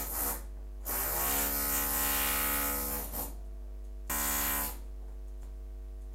Sounds of power drill